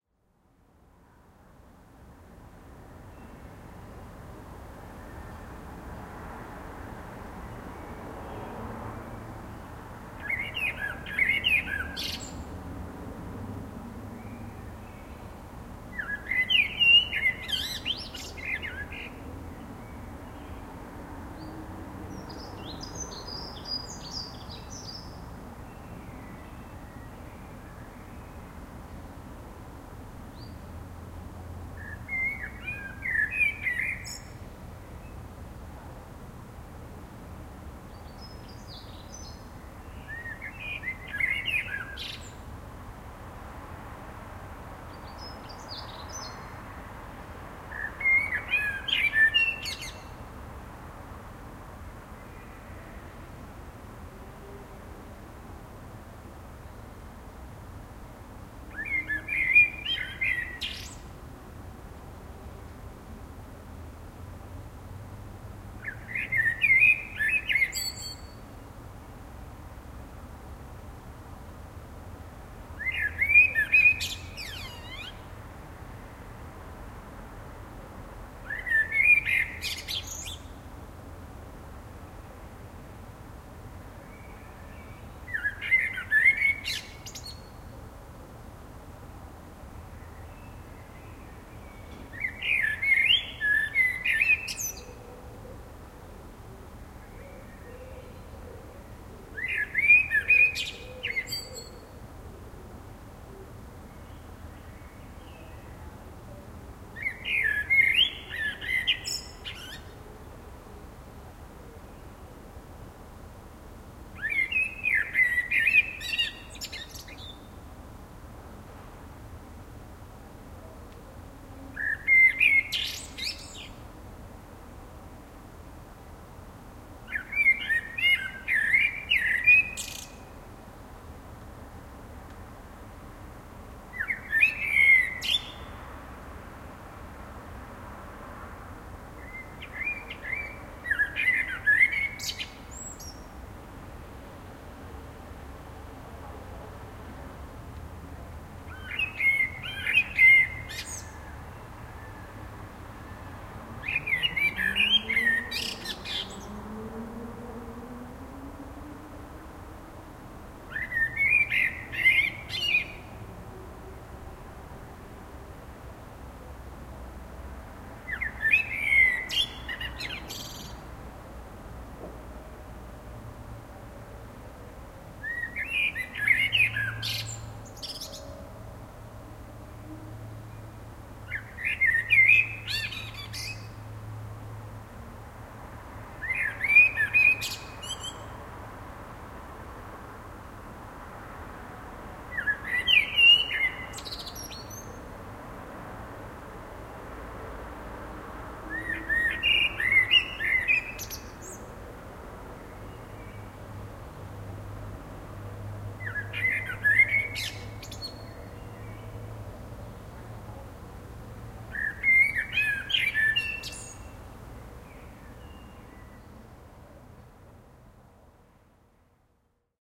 blackbird urban garden
This was the original recording of a blackbird singing in the garden. I used this with some processing to make a clean version of it. Zoom H1